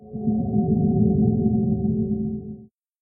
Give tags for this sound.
game; sci-fi; sound-design

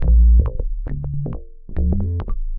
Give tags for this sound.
bass; toy; liquid; loop; guitar

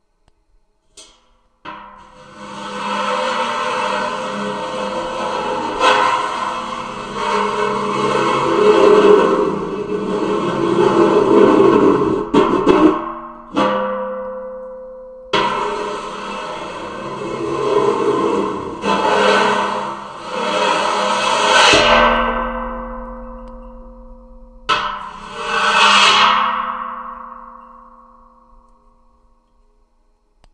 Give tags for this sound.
piezo; tines; scrape; metallic; scraping; metal; swish; contact-mic; swishing

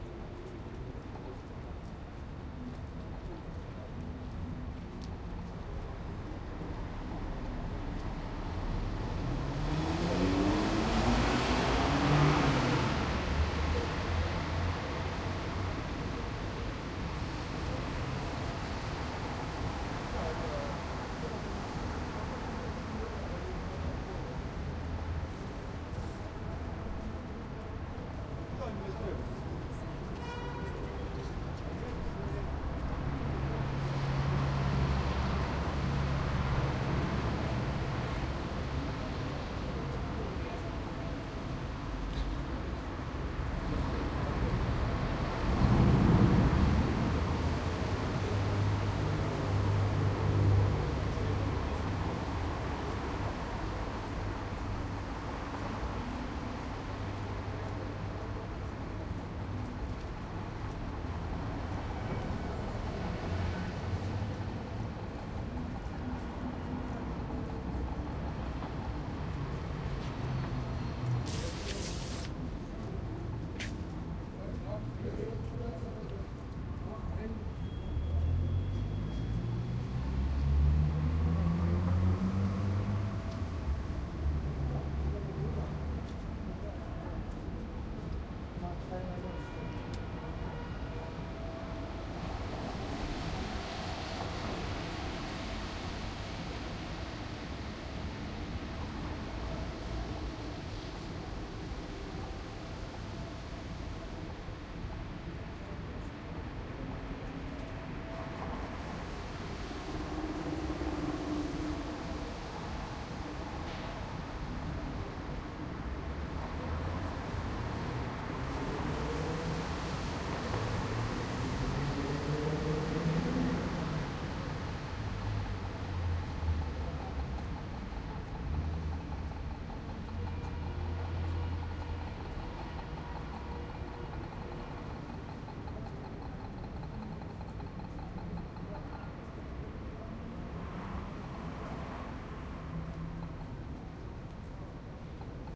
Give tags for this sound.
cars; field; korzo; pedestrians; rijeka

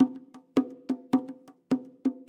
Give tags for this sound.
bongo; percussion; loop; drum

